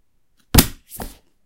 Book Drop - 4
Dropping a book
slam, closing, magazine, paper, close, drop, library, impact, bang, crash, newspaper, reading, thud, book, read